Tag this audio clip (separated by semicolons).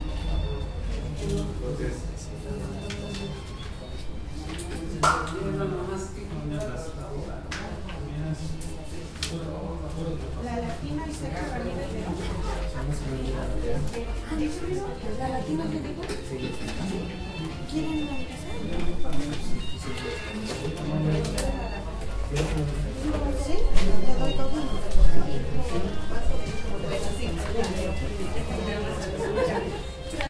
Burocratic; Office; Room